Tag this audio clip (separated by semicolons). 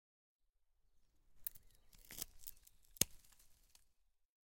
panska; scissors; brancher; branchers; garden